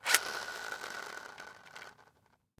knijpkat zing
A knijpkat is a flashlight that's manually driven (no batteries) by squeezing a handle attached to a dynamo inside the knijpkat. This is the sound it makes.